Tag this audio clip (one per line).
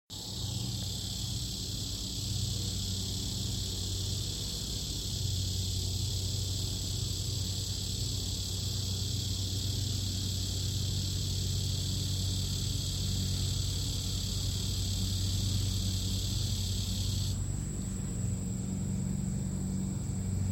Cicadas
Peaceful
Real